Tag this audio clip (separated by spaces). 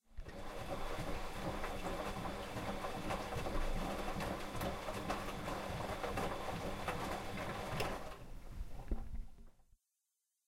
washer-machine UPF-CS13 washing-clothes washer campus-upf